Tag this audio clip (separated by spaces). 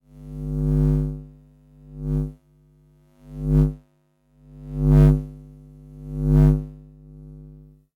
amp arc ark audio buzz design effects electric electrical electricity fuse glitches ninja plug shock socket sound spark sparkling sparks volt voltage watt zap zapping